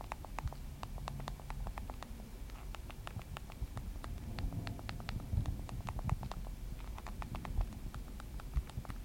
texting button presses
Text message being typed onto a mobile phone.